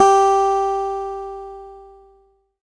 Sampling of my electro acoustic guitar Sherwood SH887 three octaves and five velocity levels